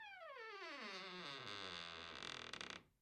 Creaking Wooden Door - 0002
The sound of a wooden door creaking as it is opened.
Creak, Door, Household, Squeak, unprocessed, Wooden